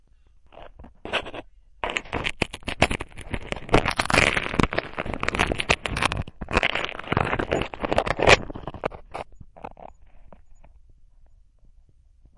Recorded on a ZOOM Digital H4N recorder with a hand made crystal microphone attached. This is a sound of a cracker being eaten.